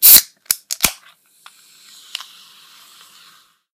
Clean recordings of opening cans of Coke recorded with a 5th-gen iPod touch.